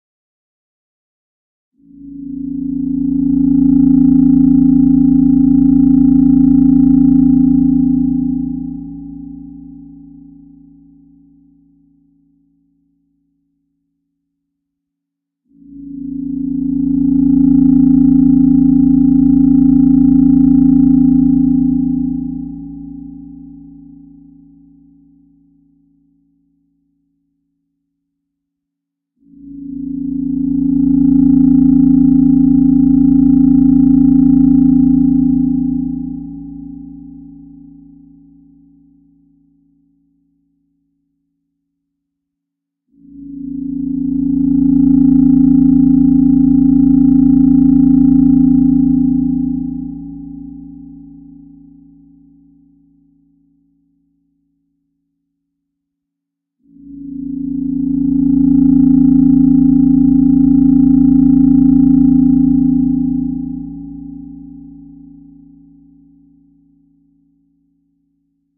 radar scanner deepsea hydrogen skyline com
A subtle rumbling or pulsing that could resemble a spacecraft or underwater computer system ... Enjoy!
Made in FL Studio 10
space, rumble, underwater, sub, scanning, submarine, radar, pulsing, depth, growl